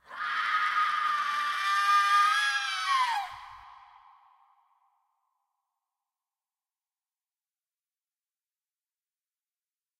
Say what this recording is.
Me screeching into a mic. I pitched up the file and it sounds like a woman screaming.
darkness, disturbing, fear, horror, pain, painfull, scream, screaming